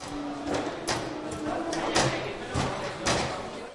sound 3 - table football
Sound of the players of a table-football being moved.
Taken with a Zoom H recorder, near the sticks where the players hang.
Taken in the cafeteria.
bar, campus-upf, football, game, goal, soccer, table, UPF-CS14